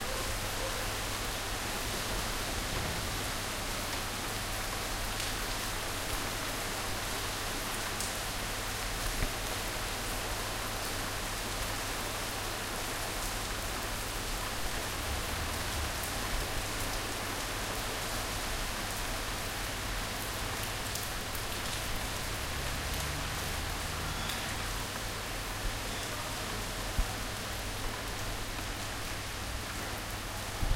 sound of rain in Madrid, recorded from a window. Zoom H1 recording